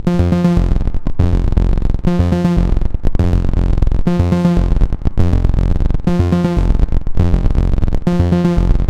Made on a Waldorf Q rack
waldorf, 120bpm, loop, crunchy